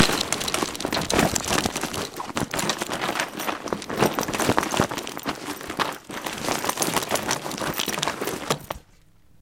Sounds For Earthquakes - Textile
I'm shaking some textile bags. Recorded with Edirol R-1 & Sennheiser ME66.
rattling, suspense, textile, collapsing, shaked, waggle, moving, shake, shaking, rumble, earth, falling, quake, rumbling, stirred, motion, shudder, rattle, paper, earthquake, collapse, noise, movement, stutter